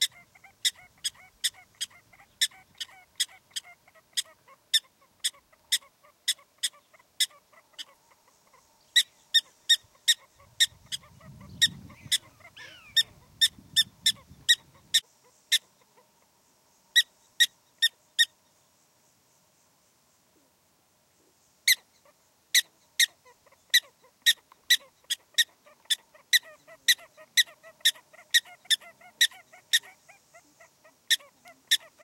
Prairie Dogs from Bad Lands-South Dakota
A field of Prairie Dogs chirping. Recorded in the Bad Lands of South Dakota.
chirps, ground-squirrel, prarie-dogs